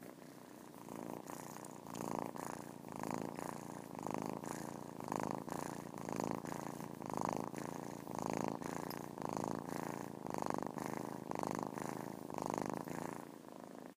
poppykitten purring
Taken with an iphone 5. A three month old kitten purring. Recorded right next to kitten.
animal, cat, cat-sounds, feline, kitten, kitty, pet, purr